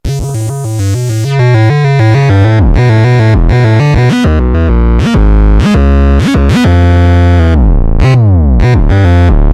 Acid Bass 3
Micron Sounds Pack
Acid Like Bass
Random Synthy Sounds . .and Chords
and Some Rhythms made on the Micron.
I'm Sorry. theres no better describtion. Im tired